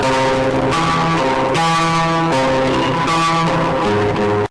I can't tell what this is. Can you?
Another little guitar thingy. Really noisy. Cut off a little at the end
guitar, lo-fi, loud, noise